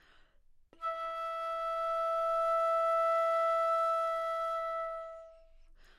overall quality of single note - flute - E5
Part of the Good-sounds dataset of monophonic instrumental sounds.
instrument::flute
note::E
octave::5
midi note::64
good-sounds-id::114
dynamic_level::p